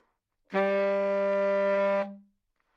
Part of the Good-sounds dataset of monophonic instrumental sounds.
instrument::sax_baritone
note::G
octave::3
midi note::43
good-sounds-id::5512
Intentionally played as an example of bad-richness